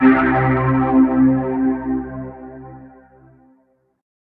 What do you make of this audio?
warm basssynth 4002
Just something that gives one the same comfortable, warm feeling when listening to it. I have tried to obtain a synthbass sound which is warm and slightly overdriven. Listening and watching the video on the link, I wanted something that sounded like it was coming from vintage speakers and valve based synths.These samples were made using Reason's Thor synth with 2 multi-wave oscillators set to saw. Thor's filter 1 was set to 18dB Low pass, Thor's waveshaper was used to provide a touch of soft clip followed by Filter 2 also set to low pass.
analogue, synth-bass, synthbass, vintage, warm